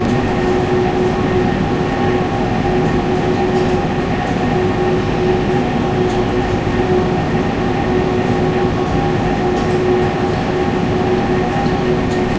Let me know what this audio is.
At lower volumes this sound (which is the sound of an industrial refrigeration unit) sounds like a distant factor humming away at night; or perhaps even the cabin of a motor car. This sample should be easily loopable.
humming, refrigerator, industry, machine